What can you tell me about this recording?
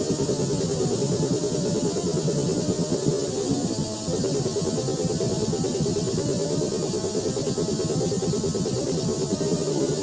its a sound of my cell phone batery explosion. remix by me with audacity
audacity, batery, loop